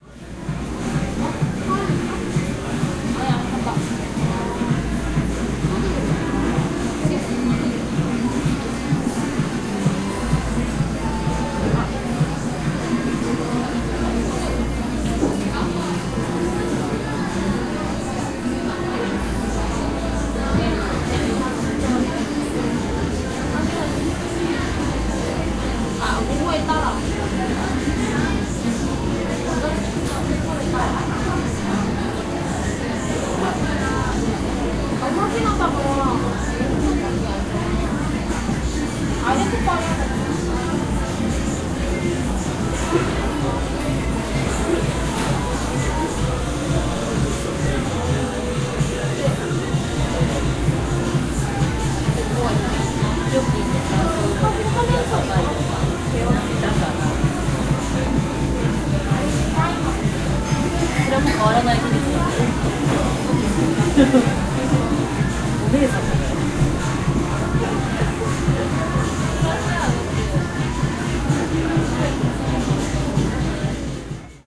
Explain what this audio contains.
Café coffee shop at Ewha university area. Music. People talking in Korean.
20120528